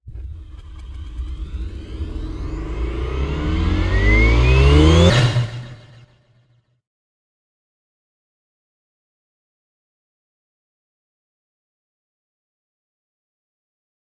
A start up sound for space ships
power-up, sci-fy, power, starship, electricity, spaceship